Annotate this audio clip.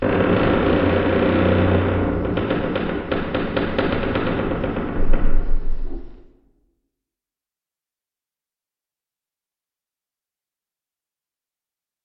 Door creaking 03 2 with Reverb
close, closing, clunk, creak, creaking, creaky, door, handle, hinge, hinges, lock, open, opening, rusty, shut, slam, slamming, squeak, squeaking, squeaky, wood, wooden